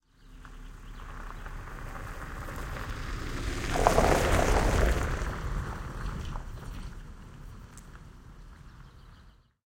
HC Toyota Pickup Spaced Omni's
A recording of a Toyota pickup truck passing from right to left made using a pair of spaced omni's on a boom around 20cm from the road surface. Good stereo imaging and width.
field-recording, gravel-road, passing-vehicle, pickup-truck, vehicle, yorkshire